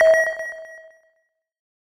A synthesized high tech input menu select sound to be used in sci-fi games. Useful for all kind of menus when having the cursor moving though the different options.